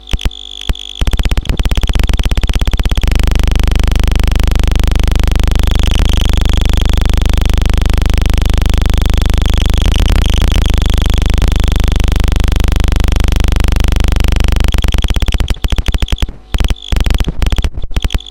KEL-NastyDrone3
You know these Electronic Labs for kids & youngsters where one builts electronic circuits in a painting by numbers way by connecting patch-wires to springs on tastelessly colourful boards of components?
I tried and recorded some of the Audio-related Experiments - simple oscillators, siren, etc. from a Maxitronic 30 in One Kit.
I did not denoise them or cut/gate out the background hum which is quite noticable in parts (breaks) because I felt that it was part of the character of the sound. Apply your own noise reduction/noise gate if necessary.
Astable, DIY, Electronic, glitch, lab, Oscillator, Soundeffects